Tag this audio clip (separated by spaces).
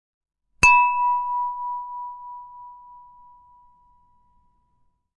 chime clink cup glass hit reverb sound